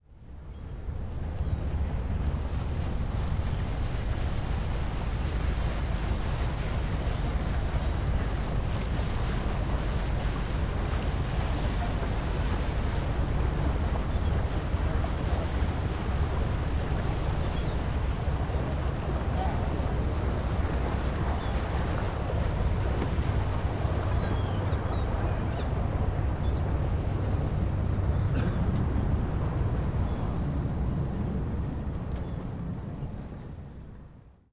Boat Passing By (No Processing)
A Tugboat cutting through the waves. Same as the other "Boat Passing By" I uploaded, but without the processing on it. There's a constant low-frequency rumble that can be heard.
Recorded with a Sennheiser 416 into a Sound Devices 702 Recorder. Edited in ProTools 10.
Recorded at Burton Chace Park in Marina Del Rey, CA.
Water, Waves, Transportation, Ocean, Boat, Travel, Ship, Field-Recording, Pass, Marina, Harbor